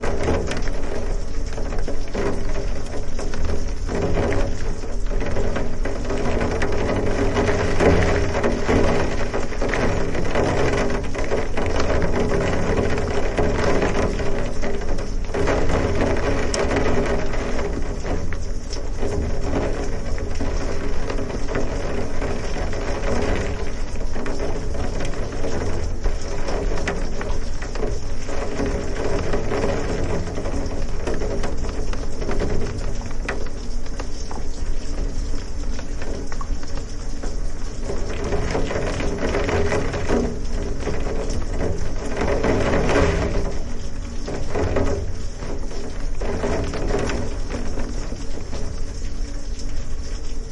Raindrops on Window
Raindrops hitting my bedroom window.
Recorded in Carson, CA. Used a Zoom H6 with the XY attachment and windscreen on, pressed mics against window for a contact recording. This was done in order to capture full resonance of the glass.
(Geotag is not at my actual address, but a nearby location.)
Drips, Field-Recording